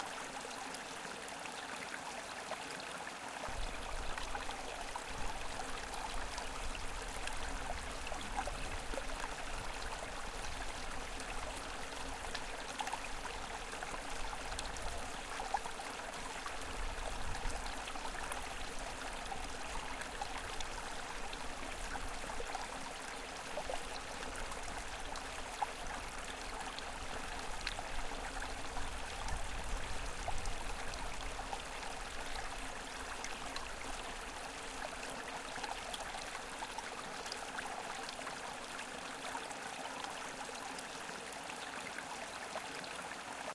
This is a loop of a creek trickle, recorded at Berry College.
loop, creek, field-recording